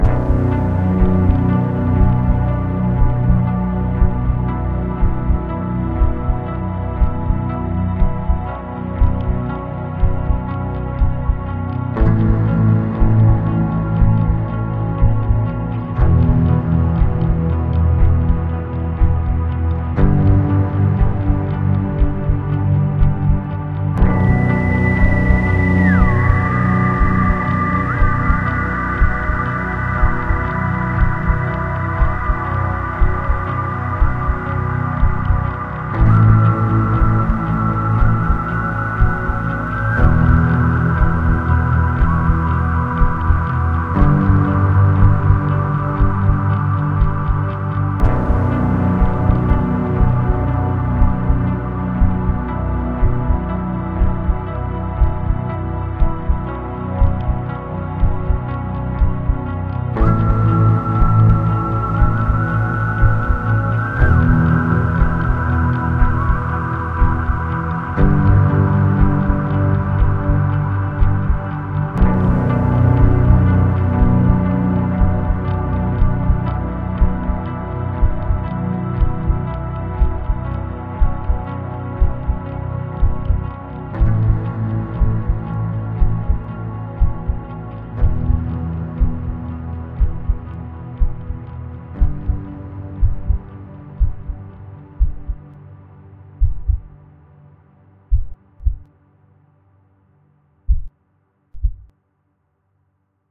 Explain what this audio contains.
hejdå - Depressive Guitar
bass, delay, depressve, drums, echo, guitar, no, reverb, sad, song, sound, whisle